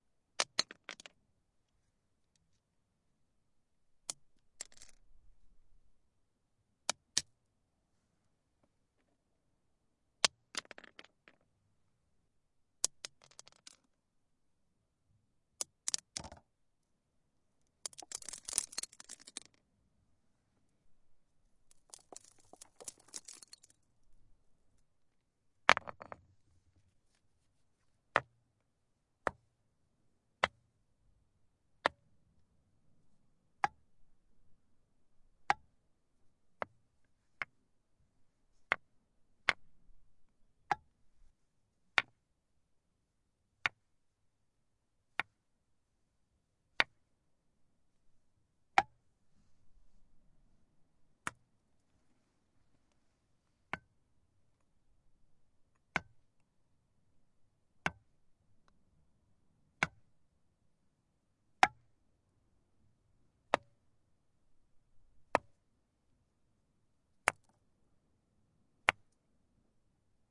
Rock-various hits
Percussive sounds from stones. Tascam DR-100
field-recording, hit, percussive, rock, stone